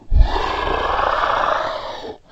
dragon growl breathy 3
Dragon sound created for a production of Shrek. Recorded and distorted the voice of the actress playing the dragon using Audacity.
monster, roar, vocalization, creature, dragon